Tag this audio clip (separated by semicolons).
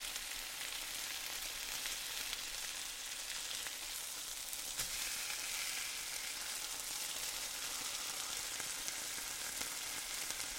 pan; sizzling; chicken; sizzle; food; stove; kitchen; cook; frying; cooking; fry